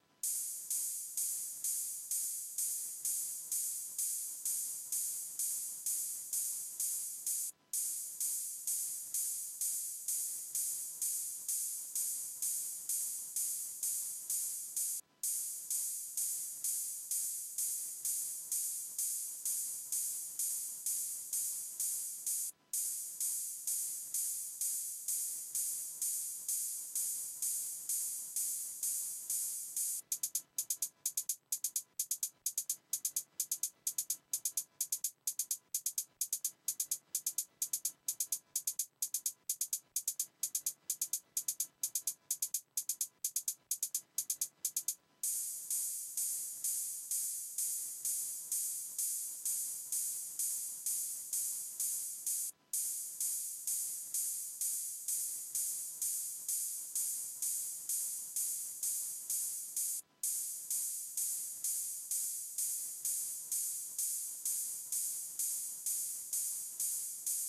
Created With:
Novation Circuit (Drums Only)
February 2019